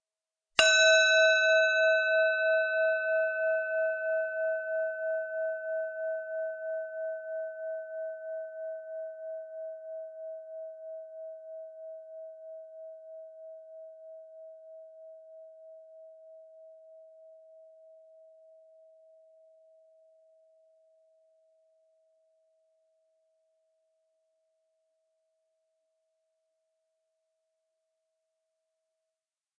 Bronze Bell 2
A stereo recording of a bronze bell (rescued clock bell) struck with a wooden striker. Long decay. Rode NT 4 > FEL battery pre-amp > Zoom H2 line in.